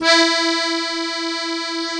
real acc sound
accordeon, keys, romantic